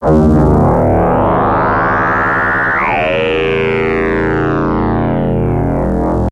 Thick low dropping filter sweep with periodic cutoff modulation from a Clavia Nord Modular synth.